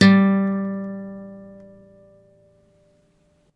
Guitar Nylon Open - G4
Nylon string guitar, plucked open string.
acoustic-guitar, guitar, nylon-string, pluck, plucked, stereo